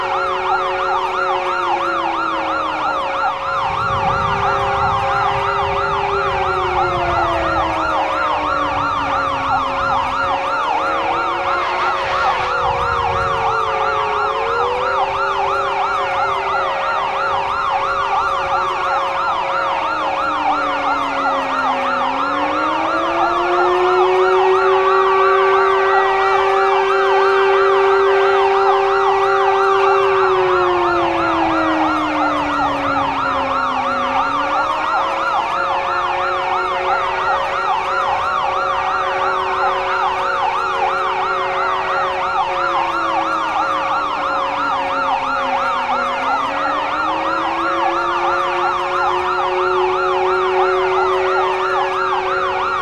A mix of sirens portraying a major disaster.